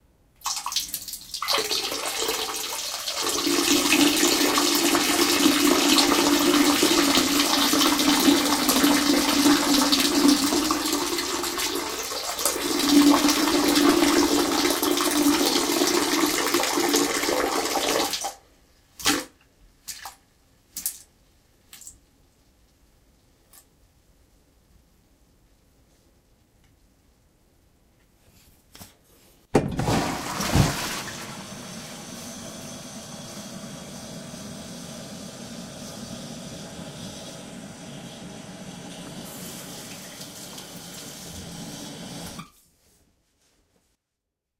Peeing into the toilet bowl.
and in the included documentation (e.g. video text description with clickable links, website of video games, etc.).
urination, urinating